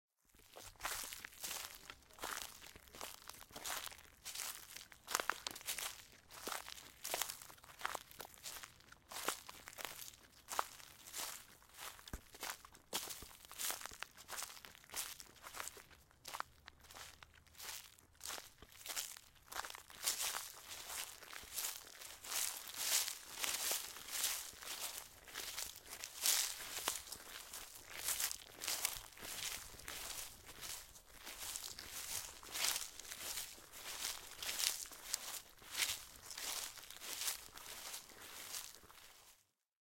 Footsteps Leaves
Outdoor recording of footsteps on dry leaves.